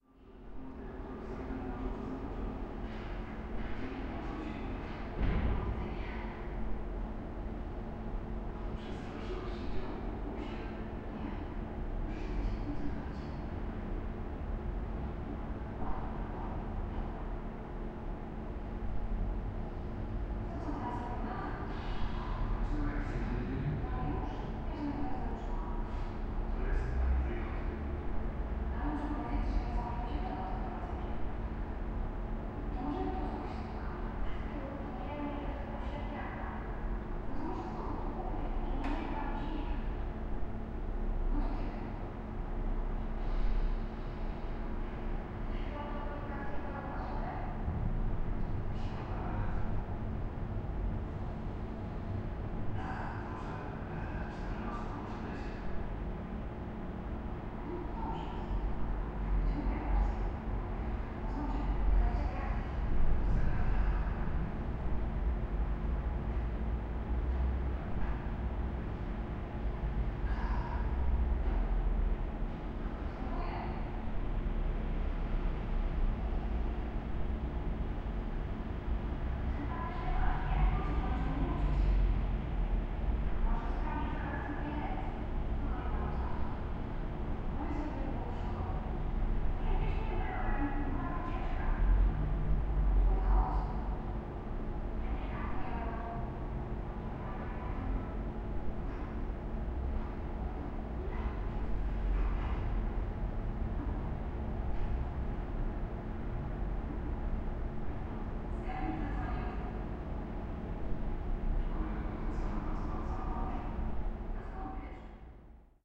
06062015 tv sound at university
06.06.2015: around 12.10, inside the building of the Faculty of Historical Science (so called Collegium Historicum) at Adam Mickiewicz University in Poznań. The building was empty - the only audible aound it was some tv series watchted by the porter. Recorder: zoom h4n+internal mics.
fieldrecording, inside, poland, pozna, serial, television, tv